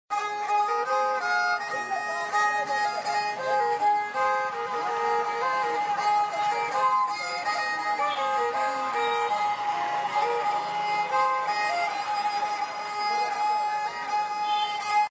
Audio clip of someone playing sarangi in the streets of Nepal
instrument, sarangi, strings